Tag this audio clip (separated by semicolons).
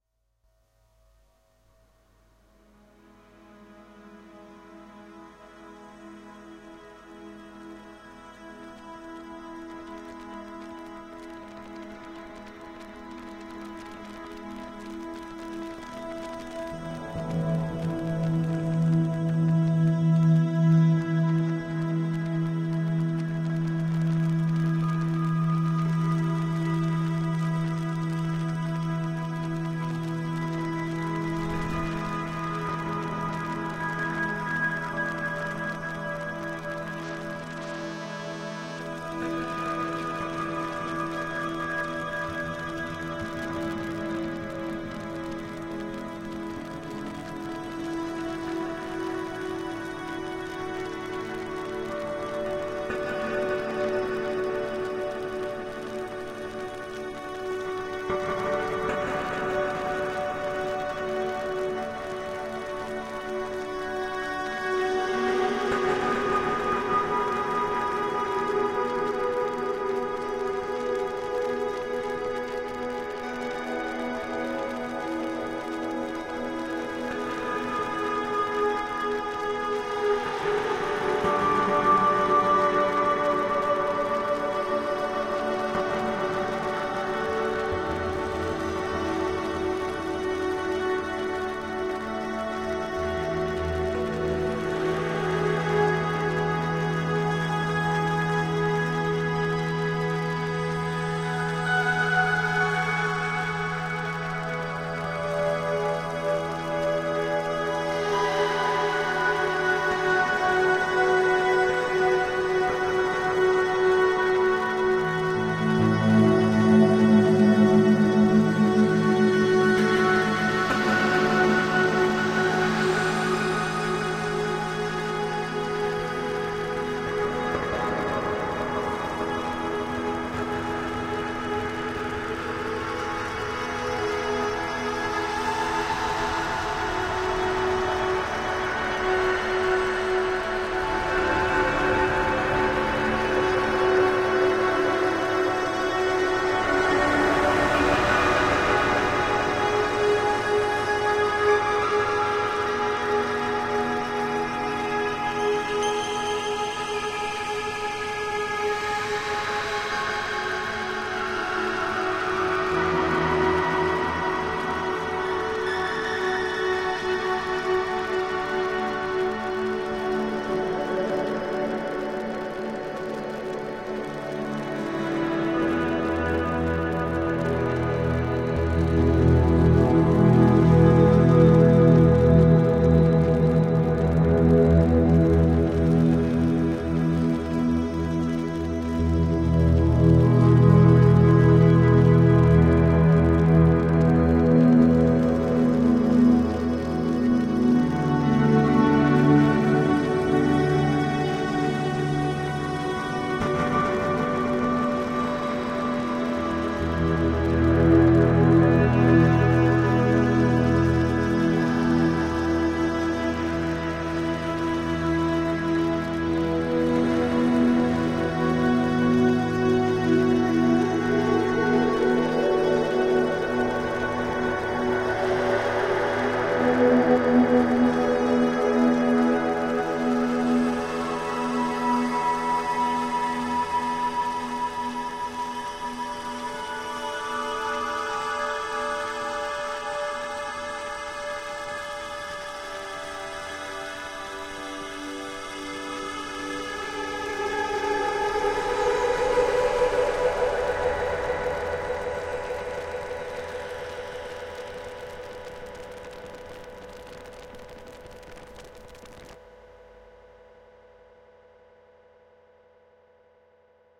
ambient; guitar; long-reverb-tail; drone